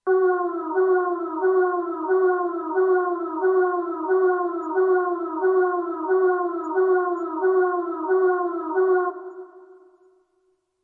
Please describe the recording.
Alarm! Alarm!
Alarm signal for emergencies/burglaries